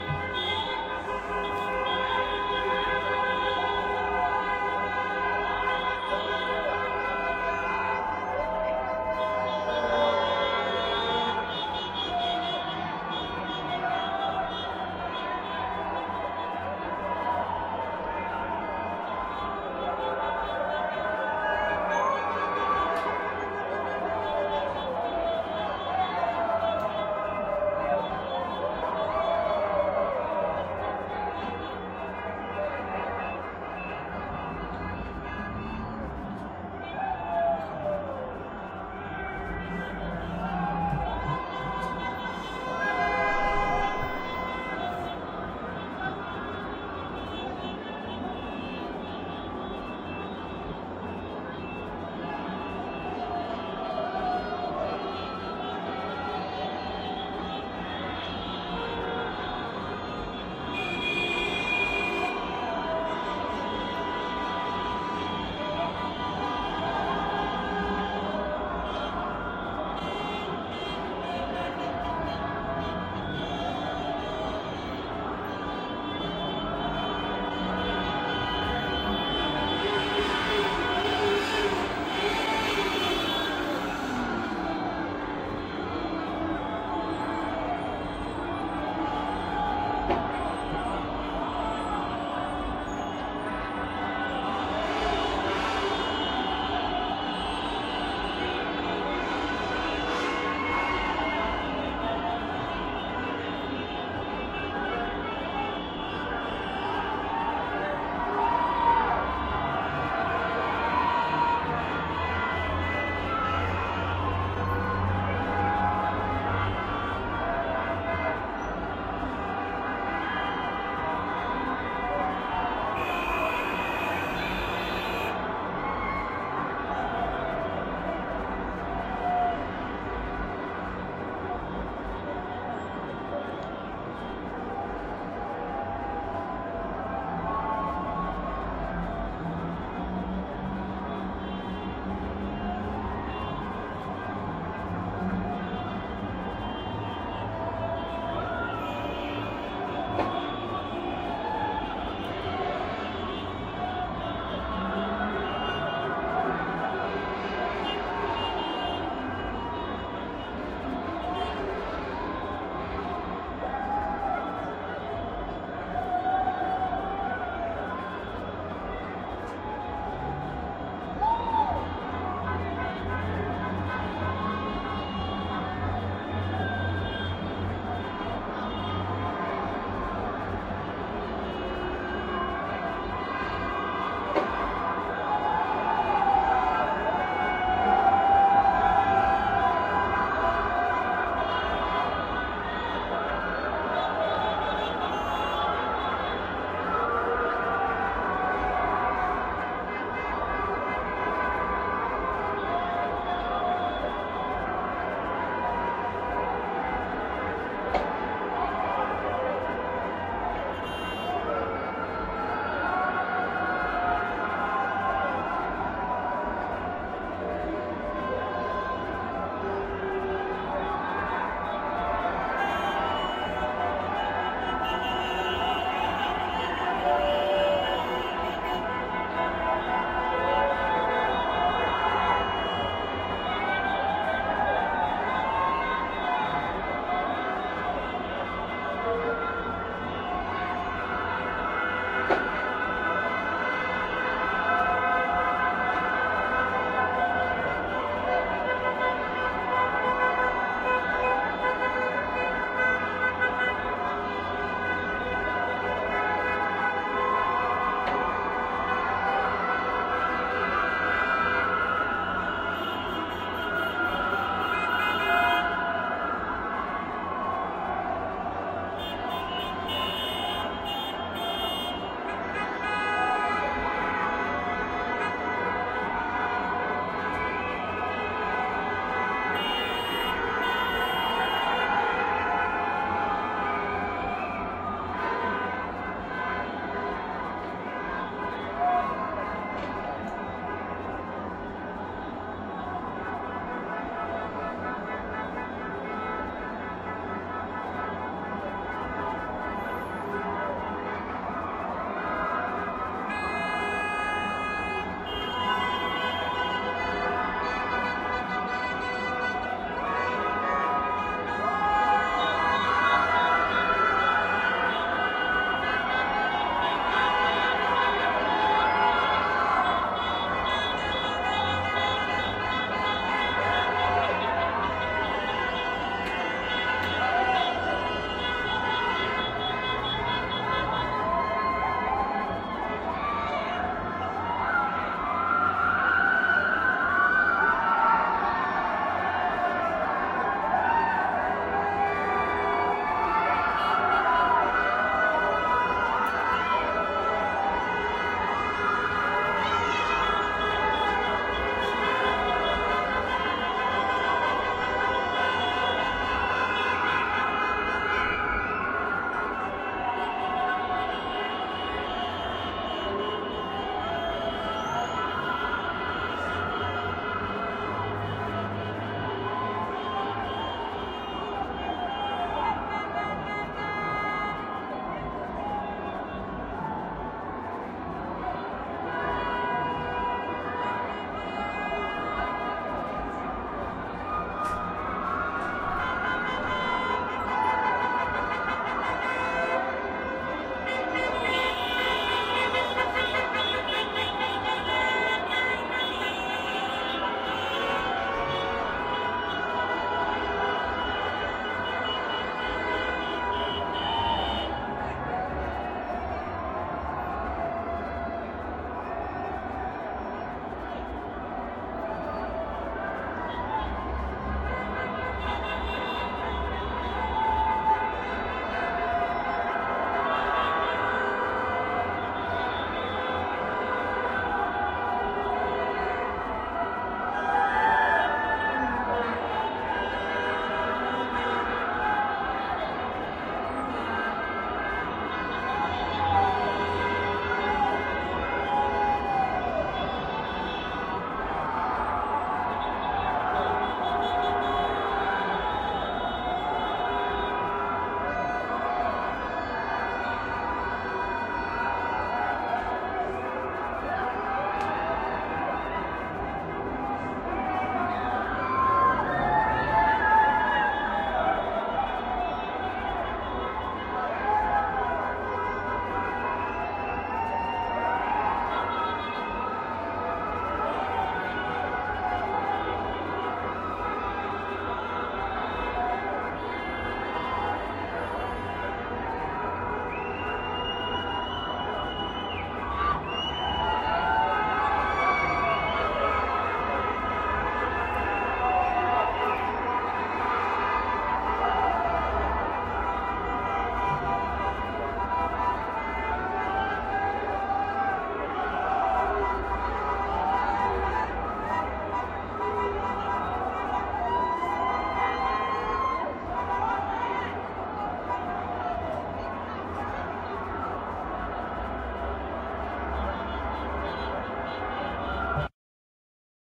Downtown traffic and crowd noises
The sounds of traffic, honking, cheering, and groups of people shouting. Taken from my window in downtown Seattle right after the Seahawks won the 2014 Super Bowl.
Mic used: Blue Yeti (USB condenser mic, cardioid pickup pattern).
ambiance, busy, cars, cheering, city, crowd, downtown, field-recording, honking, loud, noise, noisy, party, people, rowdy, Seattle, street, Super-Bowl, traffic, urban